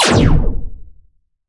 Spaceship Blaster
Bang, Blaster, Fire, Gun, Gunshot, Heavy, Laser, Light, Machine, Pew, Pulse, Rifle, SciFi, Shoot, Shot, videgame